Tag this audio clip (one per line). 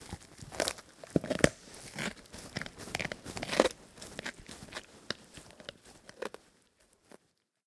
dog
monster
chew
crunch